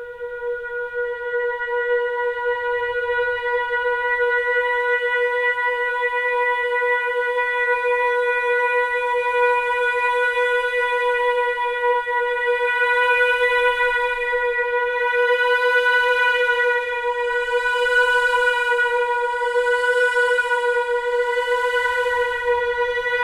A recording of my friend, Egan, playing the flute that I have edited into a drone